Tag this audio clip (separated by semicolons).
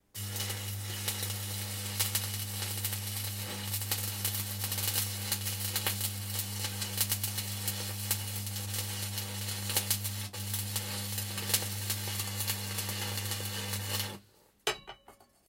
electric; electrode; jump; metal; noise; power; powerup; spark; weld; welder; welding; work